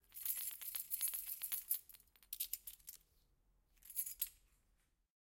Keys jingling on a keychain.
Recorded on Sony PCM-D100
Metal, D100, Jingle, Keys, Chain, Apartment